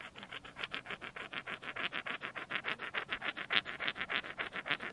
Rubbing stones
The sound of two stones being rubbed
colliding, friction, pair, rock, rub, rubbing, slide, stone